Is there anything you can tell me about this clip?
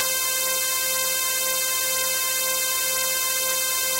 WARNING: Don't mix both channels together for mono, because then this stereo version of the synth lead sounds weird and degraded (I don't know why).